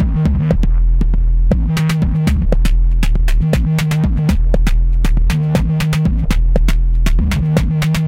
The somewhat odd downbeat Snorklers beat loop. To describe this sound and beat with words would be contra productive... Enjoy!